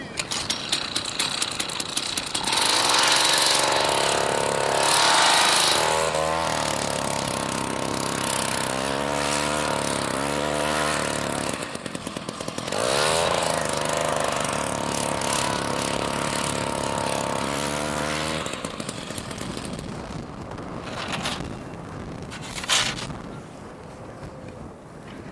ice drill gnarly raw sounds like chainsaw
drill, ice